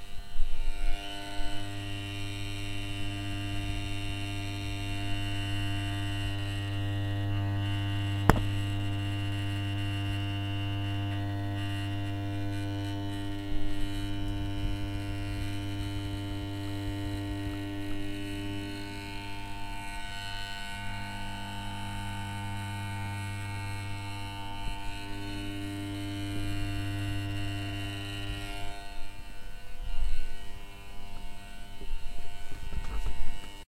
Electrical Noises Soft
cracking, crackly, crispy, electric, noise